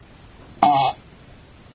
toilet fart 4
aliens, art, beat, car, computer, explosion, flatulation, flatulence, frog, frogs, gas, laser, nascar, noise, poot, race, ship, snore, space, weird